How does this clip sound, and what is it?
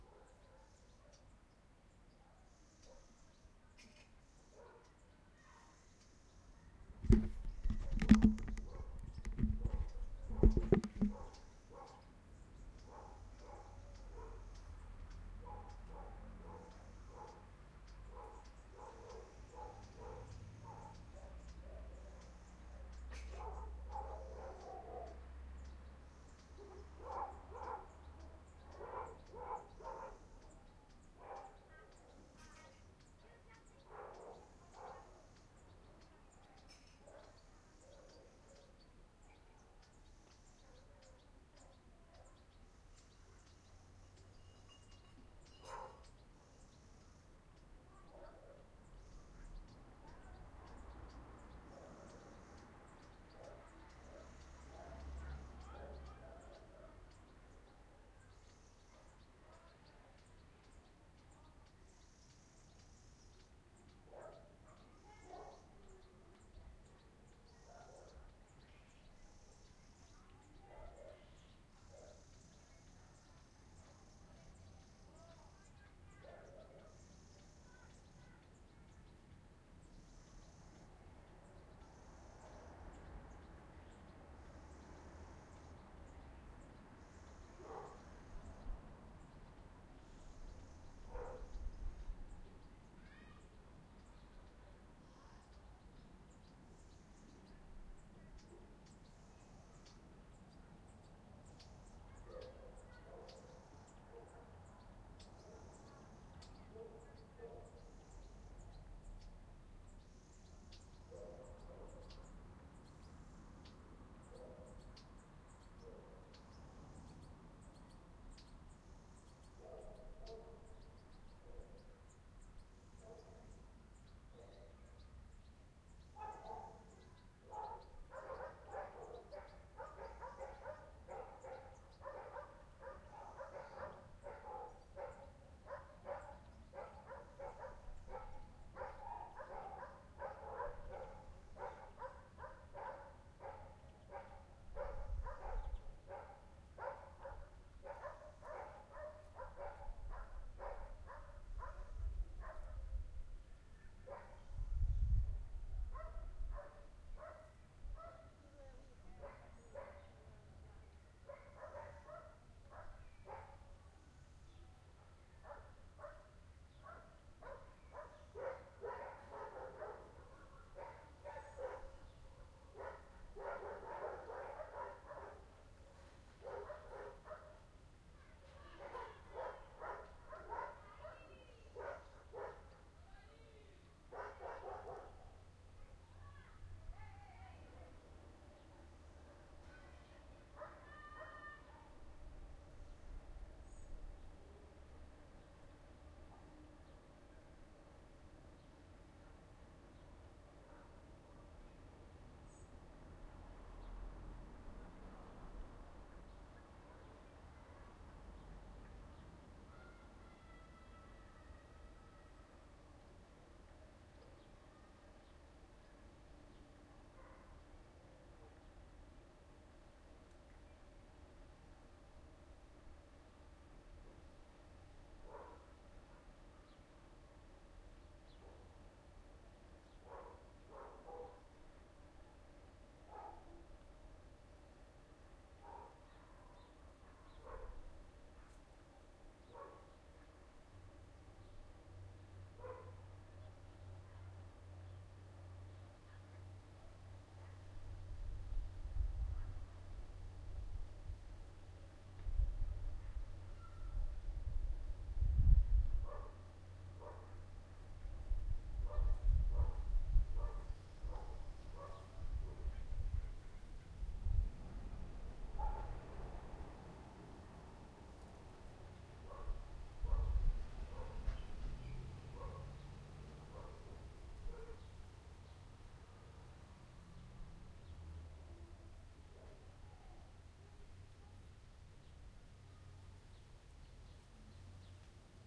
Village ambience, Portugal, near Sintra. 19 August 2016, round 19:00.
Recorded witha Zoom H1 with windshield. Despite that there is some wind noise.
Plenty of sounds in the distance: birds, dogs, a few distant cars and the odd voice.